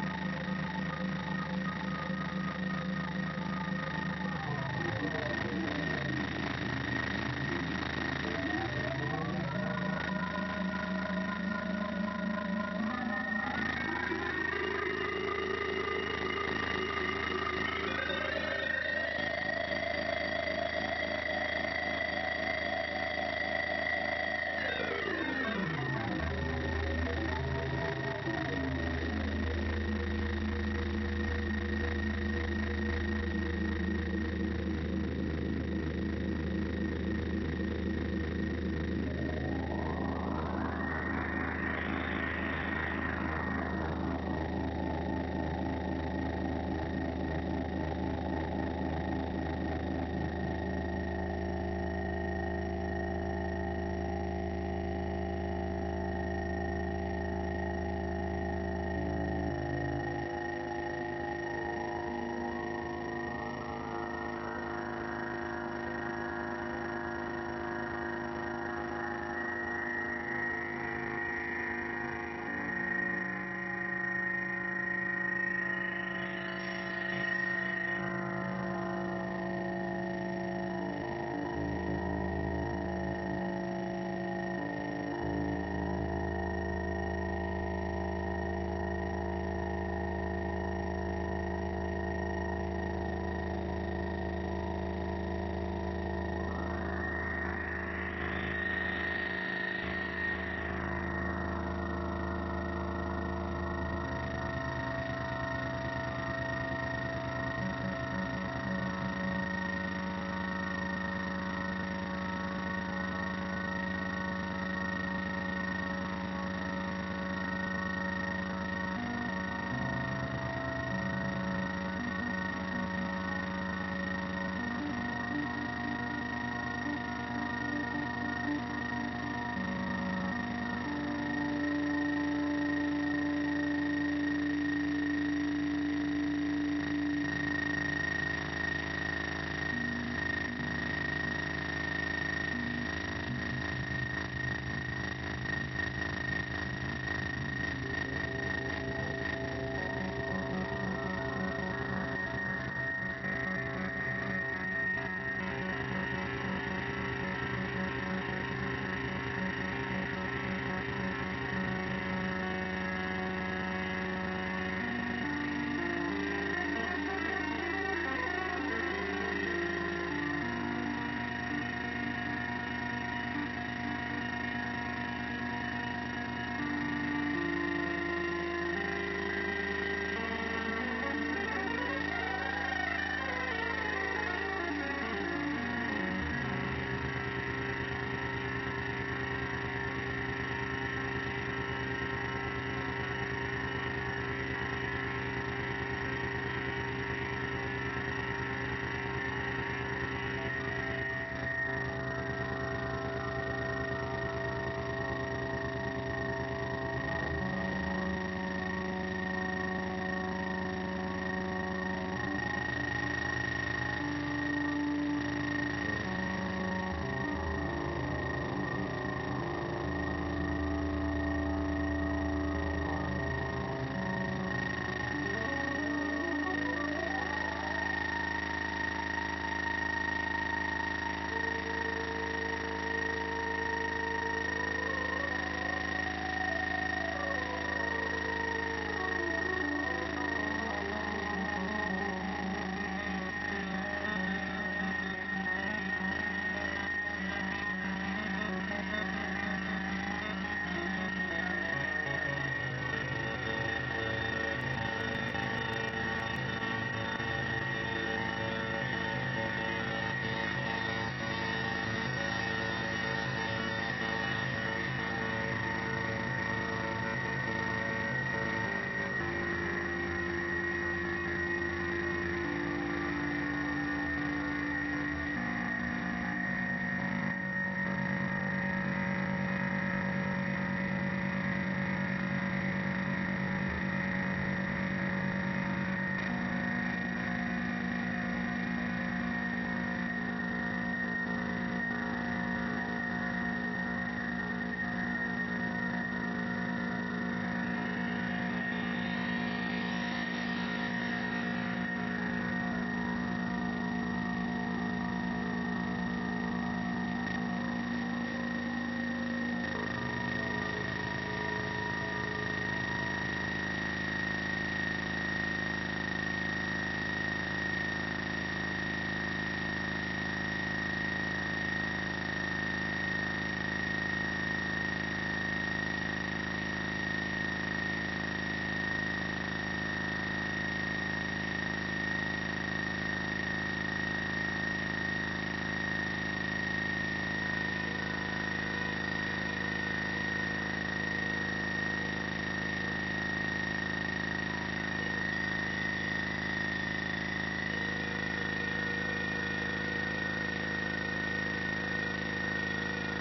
These sounds have been created in Ableton Live by a 'noise generating' VST which generates noise when fed any audio (or indeed, silence).
The audio signal then feedsback on itself. Sometimes some sort of filter was placed in the feedback loop and used to do filter sweeps.
I control some of the parameters in real-time to produce these sounds.
The results are to a great extent unpredictable, and sometimes you can tell I am fiddling with the parameters, trying to avoid a runaway feedback effect or the production of obnoxious sounds.
Sometimes I have to cut the volume or stop the feedback loop altogether.
On something like this always place a limiter on the master channel... unless you want to blow your speakers (and your ears) !
These sounds were created in Jul 2010.